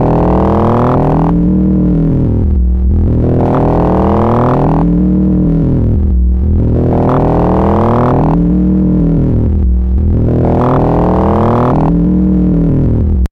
quantum radio snap033
Experimental QM synthesis resulting sound.
drone, soundeffect, sci-fi, experimental, noise